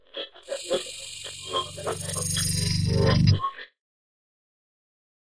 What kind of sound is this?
radio shudders16x
grm-tools, radio, shudder, sound-effect